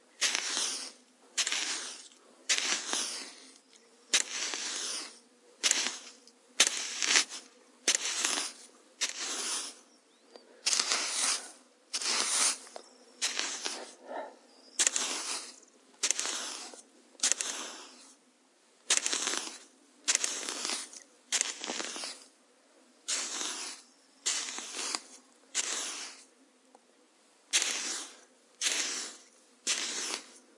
sound made excavating with a stick on the sand of a dune / sonido producido al escarbar con un palo en la arena de una duna
earth, excavation, nature, field-recording, sand